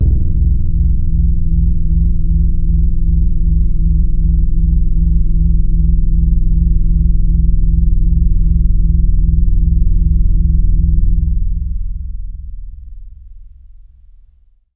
Noisy Mellowness - e0
This is a sample from my Q Rack hardware synth. It is part of the "Q multi 007: Noisy Mellowness" sample pack. The sound is on the key in the name of the file. The low-pass filter made the sound mellow and soft. The lower keys can be used as bass sound while the higher keys can be used as soft lead or pad. In the higher region the sound gets very soft and after normalization some noise came apparent. Instead of removing this using a noise reduction plugin, I decided to leave it like that.
soft, mellow